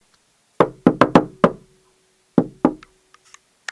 Knock on door #3
Someone knocking a secret knock on a wooden door. There is a small pause between the five knocks and the last two knocks which can be edited out. However, I decided to put it in in case you want to make it that someone on the other side of the door responds with the two knocks.
door, bang, wood, wooden, knocks, knock